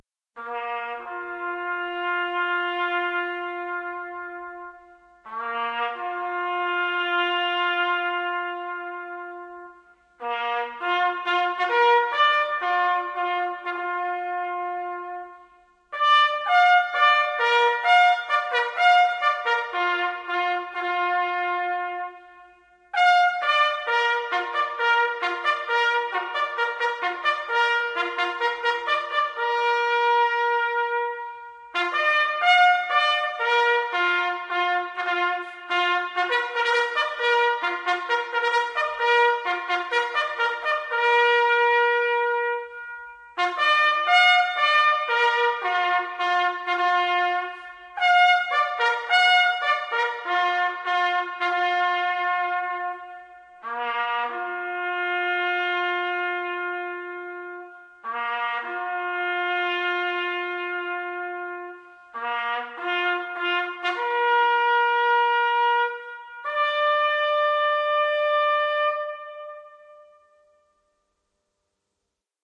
Last Post 3
A stereo recording of a bugler rehearsing the Last Post in a hall.Mixdown from two recordings, both of which had a squeaky self closing door noise present.This version was submitted in response to a request to remove traffic rumble. Zoom H2 front on-board mics.
commemoration, military, ultimate-sacrifice